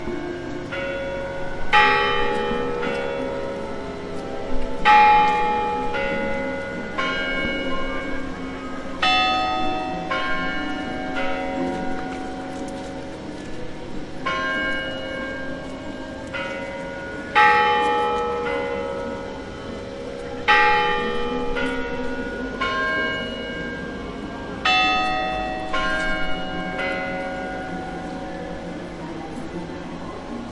Short Kremlin bells, Red Square, Moscow 18.01.2016 XY
A short recording (caught it in the middle) of Moscow Kremlin clock tower bells.
Winter fair was on the right side of the Red Square.
made with Roland R-26's XY mics.
ambience, bell, chime, clock-tower-bell, Kremlin, Moscow, Red-square, Russia, winter-fair